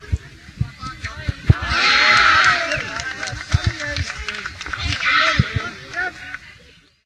applauding goal02
The second of four goals, recorded at a soccer / football game in Outrup, Denmark. Played by younglings from age 6 - 7.
This was recorded with a TSM PR1 portable digital recorder, with external stereo microphones. Edited in Audacity 1.3.5-beta on ubuntu 8.04.2 linux.
game, goal, match, soccer, younglings